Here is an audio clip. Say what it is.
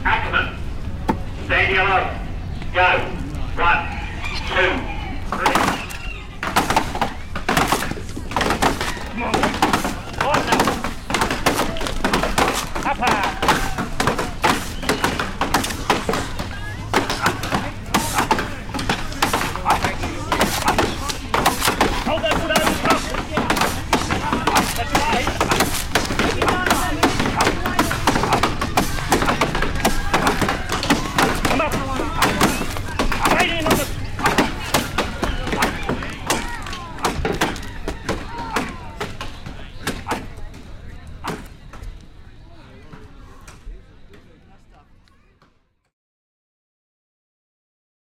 I recorded this at the Bellingen Agricultural Show, in Bellingen, New South Wales, Australia in 1999. It is the Snow Glyde Memorial Sprint Woodchop. Most woodchopping races are handicaps; so the slowest axeman starts first and so on. Often it takes three minutes before the fastest axeman begins.
The beauty of this recording is that the axeman start together and gradually fall out of time. Also the harsh Australian country accents that are heard. The expulsion of air through the nostrils by the axemen. The sounds of the poultry in the background, the Poultry Pavilion being next to the woodchopping arena.
This was recorded using aa Tascam Pro DAT with AKG CK 98 shotgun microphone with P12-48 pre-amp, also known as AKG SE300B.
australia, field-recording, wood-chopping-competition